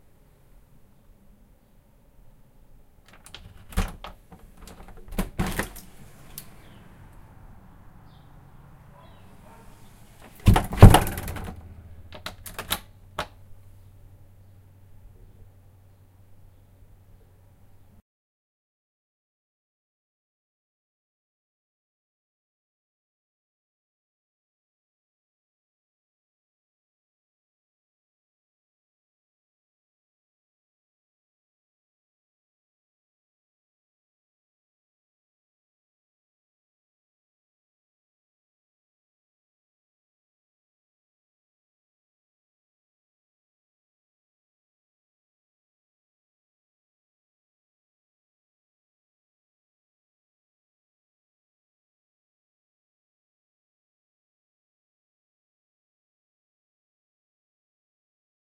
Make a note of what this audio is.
Opening and closing the front door of my apartment. Recorded with my Zoom H4n using the built-in condenser mics at a 90 degree XY coincident pattern.